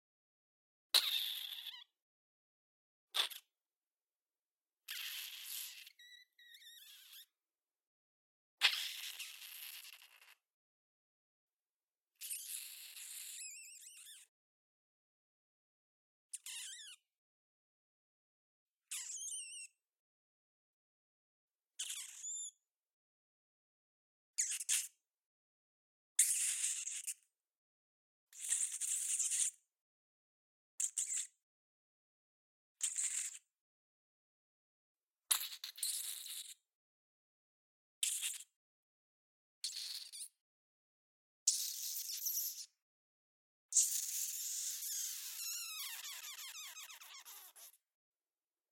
Spider Foley 03
Foley sounds made with mouth. This was intended to be spider noises for a video game, but some of them can also sound like a rat.
CAD E100S > Marantz PMD661
animal, rat, screech, game-foley, spider